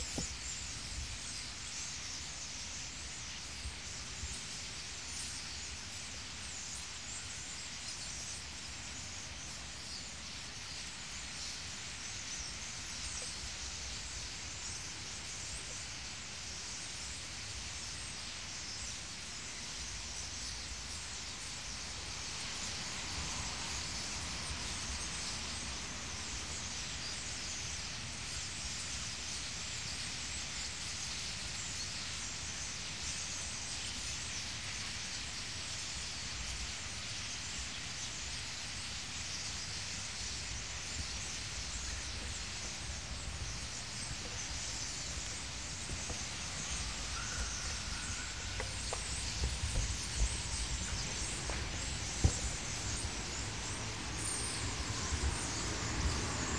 birds in park
thousand of birds singing in a park, recorded with Zoom H4n
birds, bird, field-recording, birdsong, park